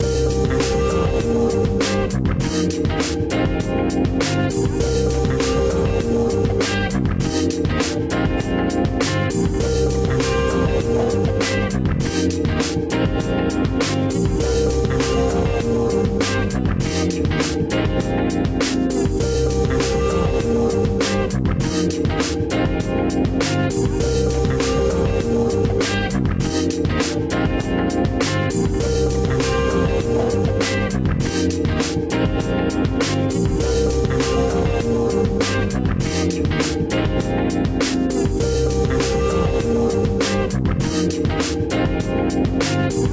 A little loop in a George Benson Luther Vandros styleeee :)
cool, funk, Groove